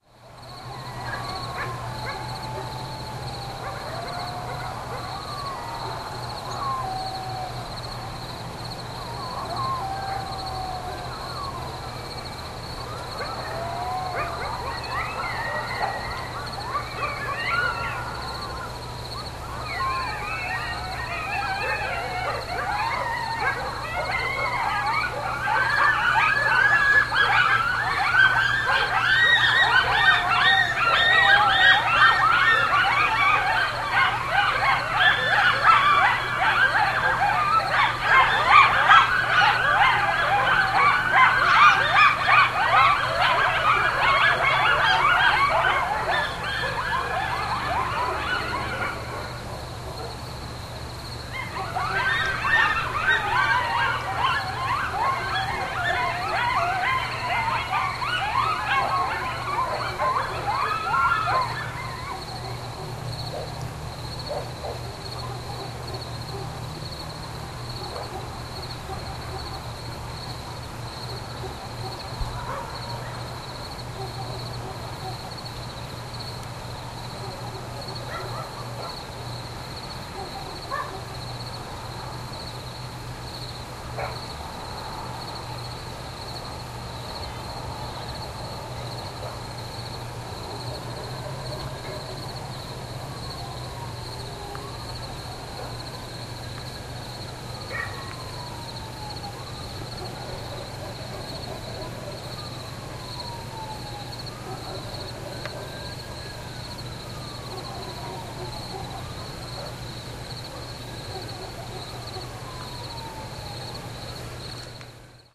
Coyotes with night ambiance
Field recording of coyotes barking and howling in the night time with reacting dogs, crickets and other night sounds, including a distant siren.
coyotes, crickets, dogs, field, nature, night, summer